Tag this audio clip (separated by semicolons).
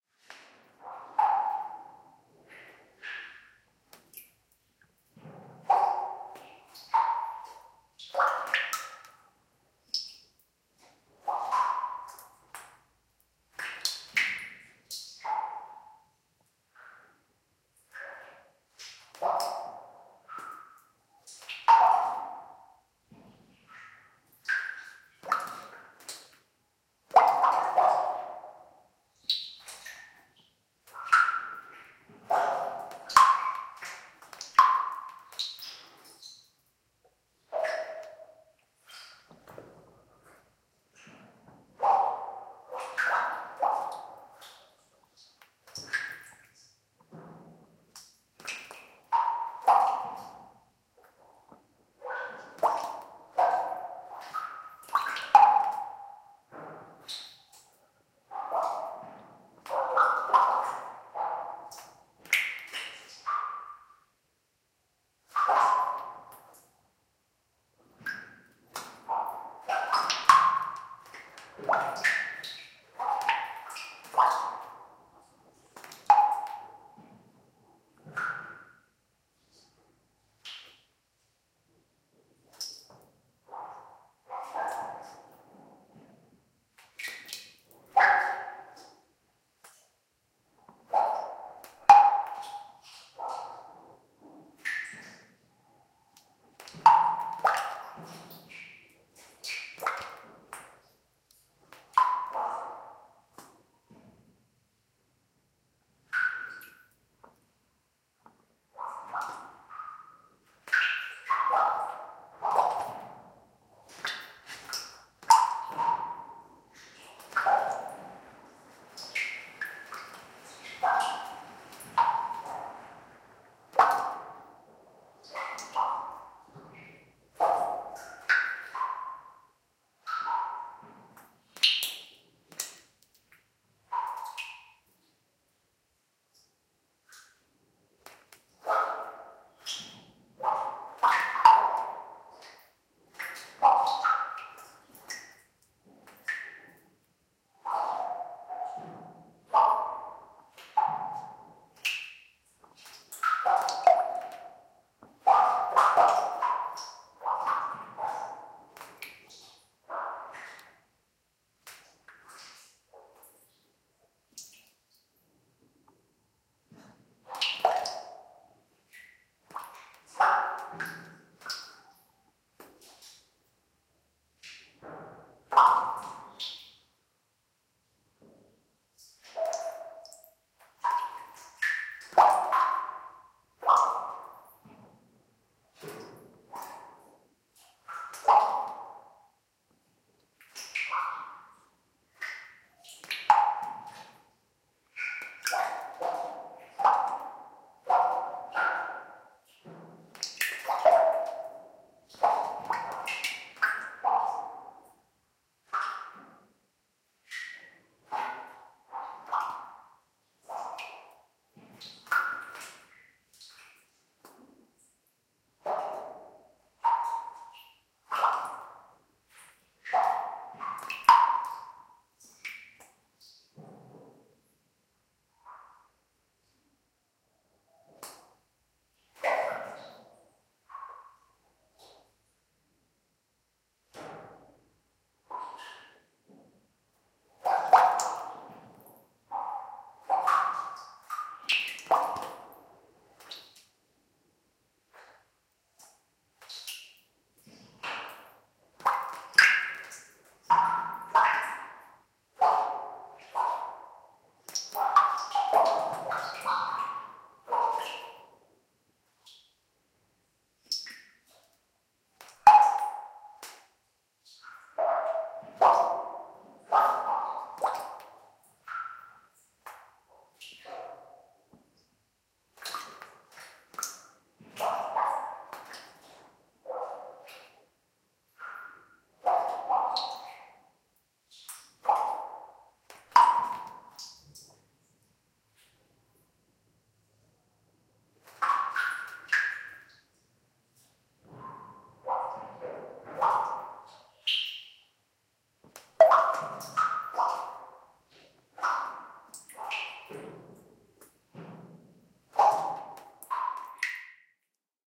cave; cavern; drip; dripping; drips; drop; drops; mine; plop; plops; water; wet